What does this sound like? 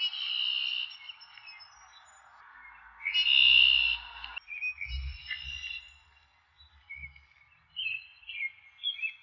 Birds2 Clean
Bird recorded edited to be cleaner
Morning, Tweet, Birds